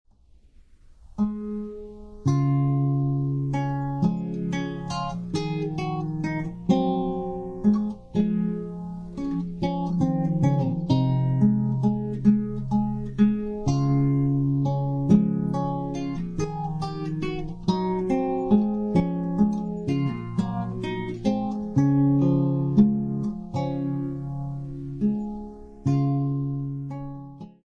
ANDANTE(partial))
Classical, Guitar, Short, Study